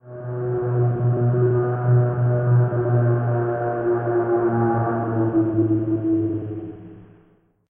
A ghostly horn sound.